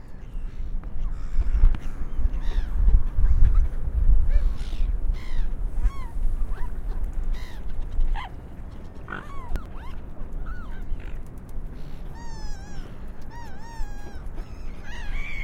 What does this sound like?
gulls and swan near river
gulls and swan near city river
birds, city, gulls, river, swan